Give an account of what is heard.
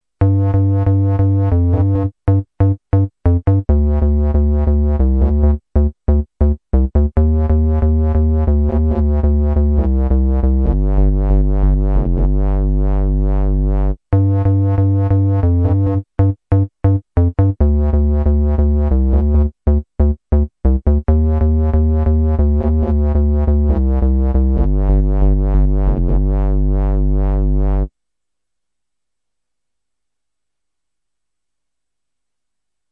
01-STEPPIN` OUT
A bassline written for a track called'Stepping out'.
bassline, bass, drum